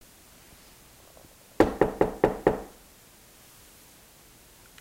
Someone knocking five times on a door. Heard from an inside perspective, but can be effected to sound like the knock is coming from the other side of the door.
banging, door, hit, hitting, impact, impacting, Knock, knocking